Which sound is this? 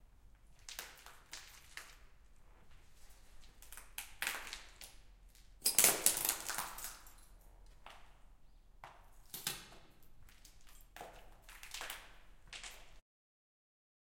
Walking on glass in open hall
Me walking across loose glass on a cement floor in a hollow hallway creating an echoey sound, Recorded on ZOOM H6 handy recorder.
Foley, Glass